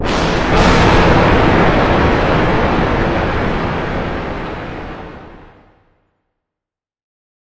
Bi-Thunder Pile-Up 4 (70% Reverb)
Two claps of thunder - or at least, a sound which resembles thunder - pile on top of each other with the application of 70% reverb, creating a vast, explosive, terrifying sound to behold.
reverb, storm, thunder, thunderclap, two